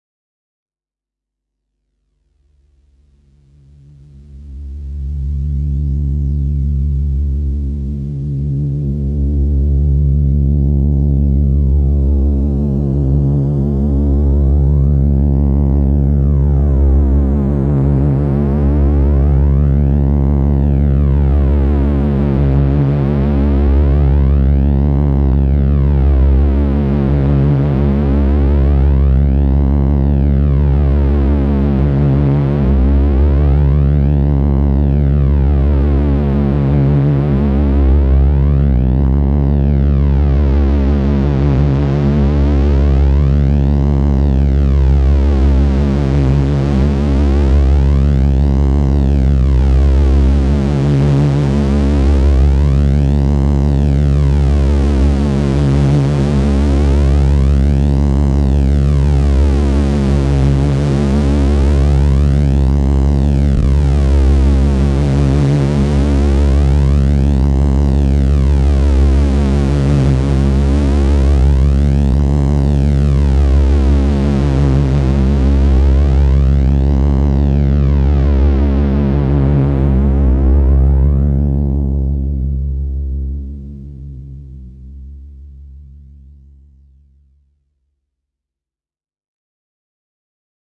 Gakken with phaser 1.5 minute drone
These samples come from a Gakken SX-150, a small analogue synthesizer kit that was released in Japan 2008 as part of the Gakken hobby magazine series. The synth became very popular also outside of Japan, mainly because it's a low-cost analogue synth with a great sound that offers lots of possibilities for circuit benders.
japan, synth, kit, drone, hardware, sx-150, gakken, noise, electronic